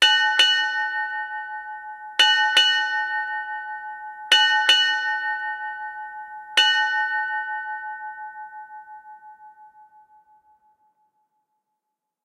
Seven Bells,Ship Time
As early as the 15th Century a bell was used to sound the time on board a ship. The bell was rung every half hour of the 4 hour watch.Even numbers were in pairs, odd numbers in pairs and singles.